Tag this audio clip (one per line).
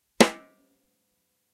rim
rim-shot
snare
unprocessed